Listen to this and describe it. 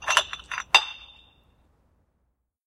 Throwing away glass trash.